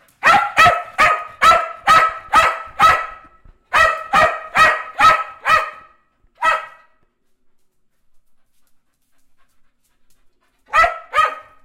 Sound of a Cocker-Spaniel Dog barking. Recorded using a Zoom H4N
bark, barking, breed, cocker-spaniel, pet